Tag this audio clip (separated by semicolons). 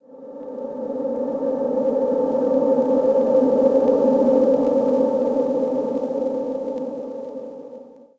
wind; granular; drone